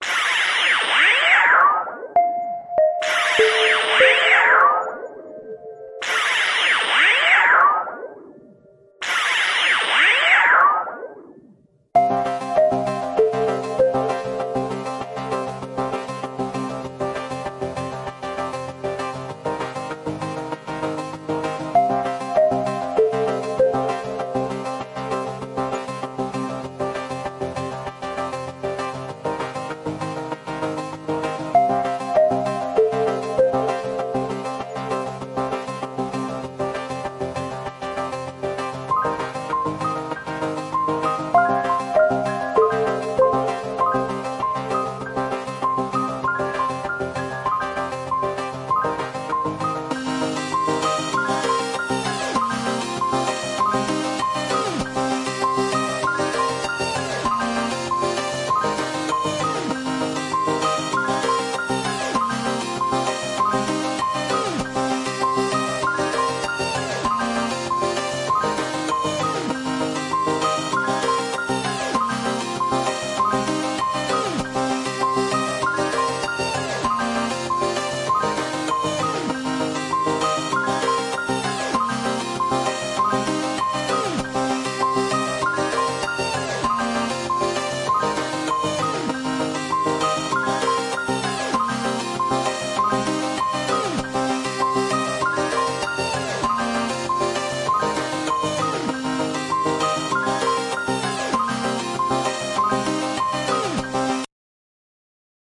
Cool Tunes
Beat, Cool, Loop, Melody, Music, Tunes